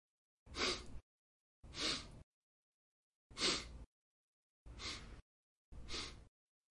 Here is a sound of someone with the sniffles
blowing, cold, cough, crying, disease, health, infection, male, man, nasal, nose, raw, sneeze, sniffle, unhealthy, virus